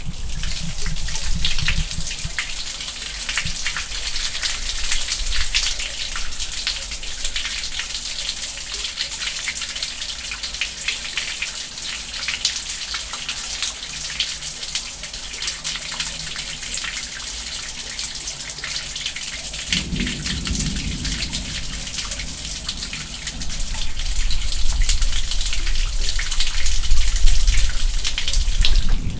Water from passing strom pouring down sewer grate recorded with USB mic direct to laptop.